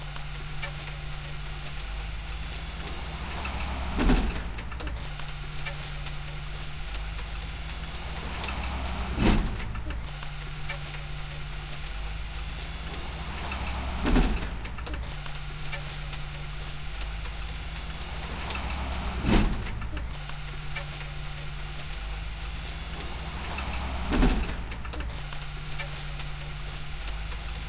Soundscape made by Amaryllis from Medonk (12 year). She lives on a boat in Mendonk and recorded sound from her environment. She mixed them in Ableton and made a ring-tone!